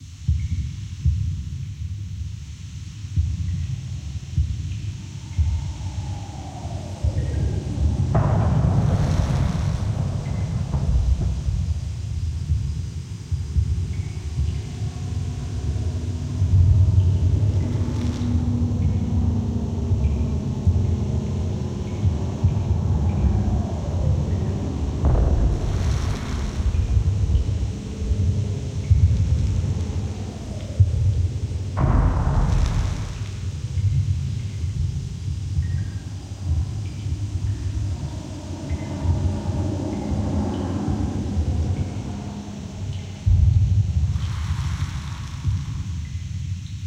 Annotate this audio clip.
Atmosphere Bombshelter (Loop)
rumble, background-sound, explosion, war, drop, airplane, steam, atmosphere, drain, atmos, sinister, atmo, bombs, terrifying, noise